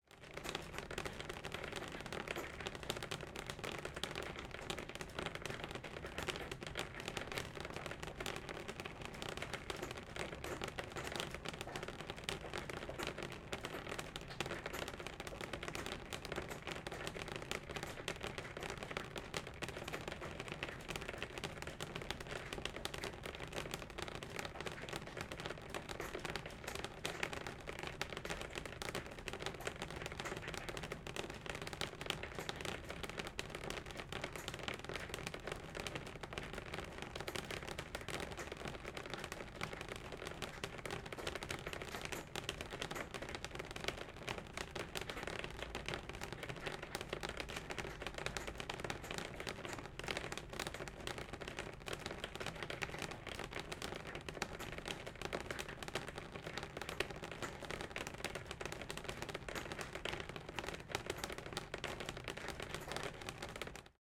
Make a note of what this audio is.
Raining on the Glass Window 20180905-1
Recording the rain inside my house.
Microphone: TLM103
Preamp: Focusrite Scarlett
nature, rain, storm, thunder, weather